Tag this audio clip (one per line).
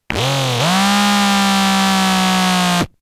transducer
electro